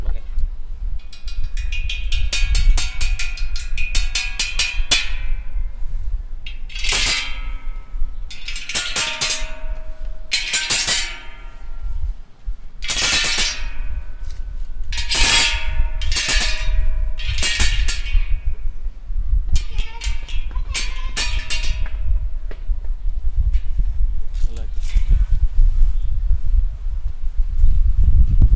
Metal-fence sticks
Luis de Camoes garden Macau
stick, sticks